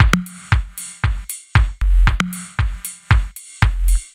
house beat 116bpm with
reverb short house beat 116bpm
beat, dance, electro, electronic, house, loop, rave, techno, trance